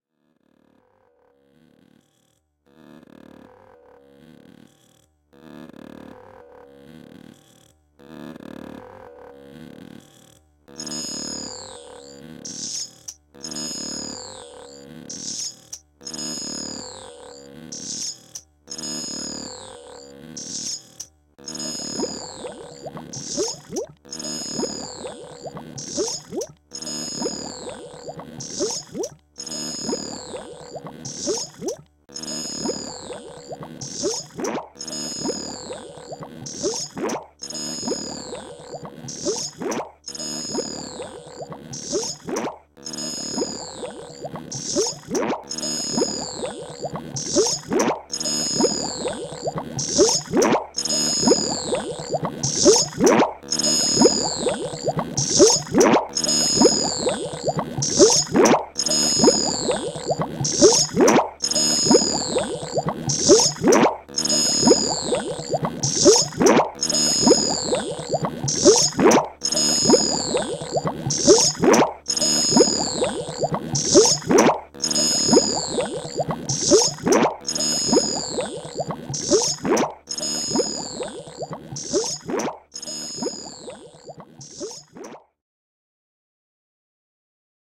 Glitch-like building loop with water and bubble elements.